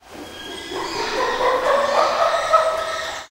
Affen schreit
A monkey cry in the Leipzig Zoo.